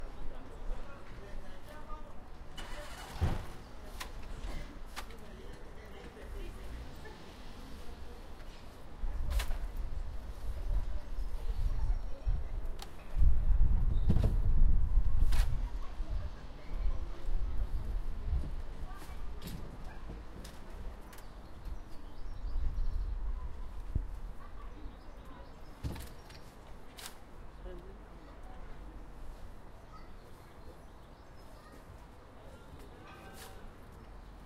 Urban Ambience Recorded at Via Barcino in April 2019 using a Zoom H-1 for Calidoscopi 2019.